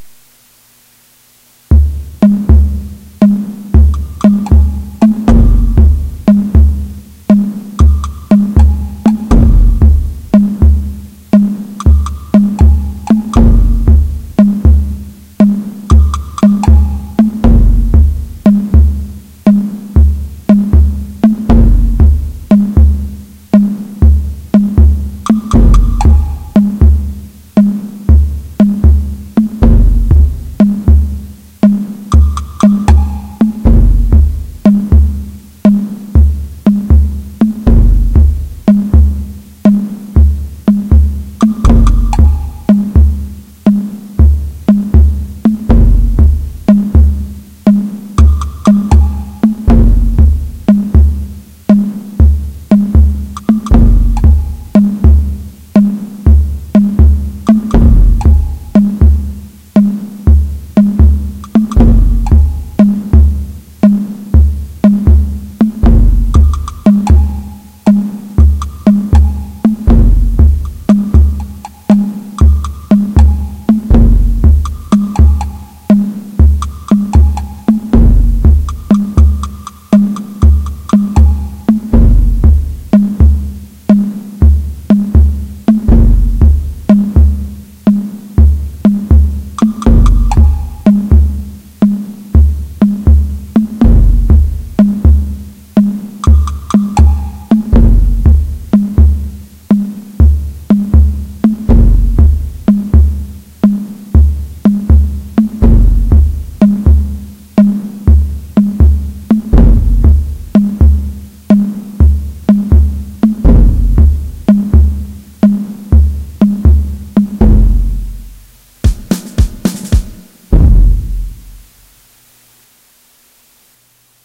Escaping the Bamboo Cage
It's hot. It's humid. It's dark.
You got to stick to the plan and make the escape. You're in the heart of the jungle and you just might be jumping out of the frying pan and into the fire. Tensions are high and your adrenaline is pumping, it's "do or die" time.
Recorded with the Yamaha YPG-525 and Audacity. Used a few Tom Drums, a Taiko Drum and some Woodblocks.
Thank you.
adrenaline, escape, escaping, escaping-quietly, heart-racing, jungle, struggle, suspense, suspenseful, tensed, tension, war-movie